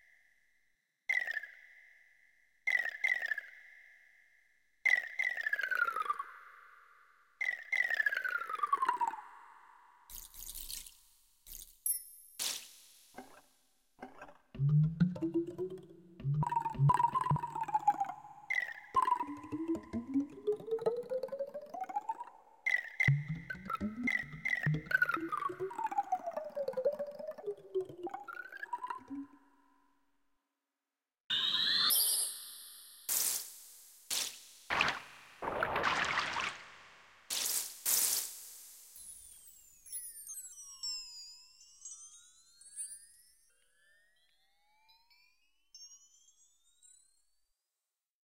A mini-collection of noises that can be extracted for single dramatic uses, likely in a horror production.